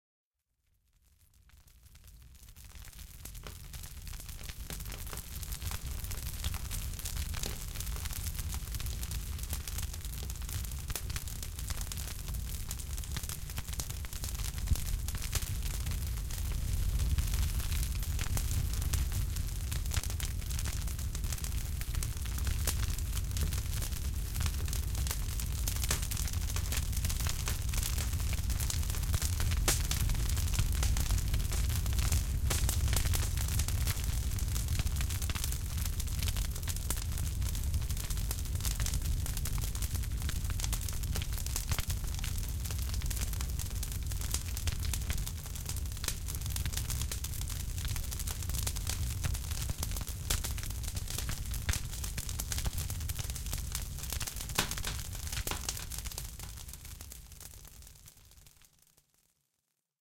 fire embers large campfire
Large campfire in back of a performance venue in Miami.
Recorded with Minidisk, Mixed and Mastered in Logic 7 Pro
large, campfire